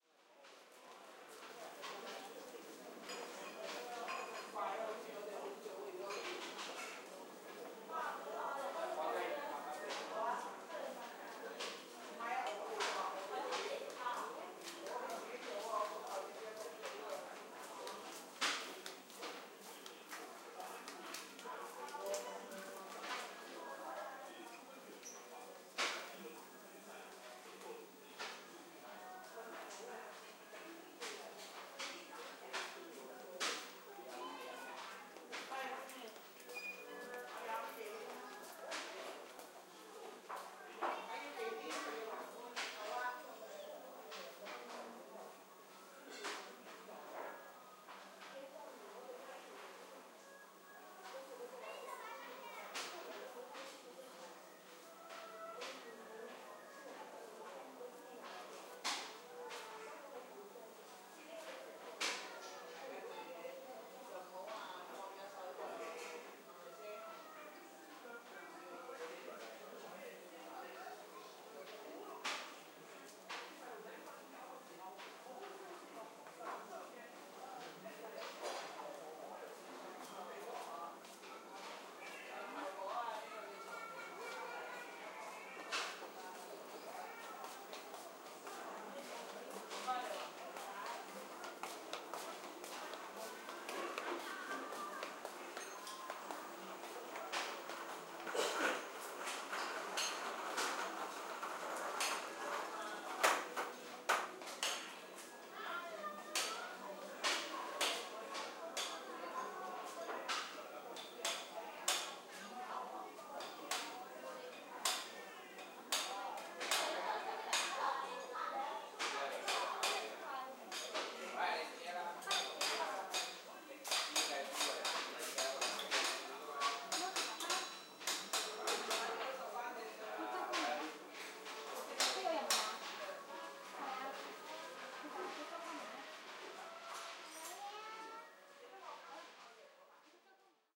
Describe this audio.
Majiang & repair bycycle
Stereo recording of people's daily life in Tai O, a small fishing village in Hong Kong. Hong Kong people love to play Majiang, especially the elderly. This is a general ambience on a lane in the residential area in Tai O. People wearing flip-flops passed by. There were some people playing majiang next door while some one was hitting something metal to fix a bike. Traditional chinese music can be heard from a distance. Sound of preparing dinner table can also be heard. Recorded on iPod Touch 2nd generation with Alesis ProTrack.
daily-life, elderly, hong-kong, majiang, tai-o